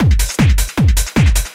hard dance loop
percussion, hard, dance, drums, loop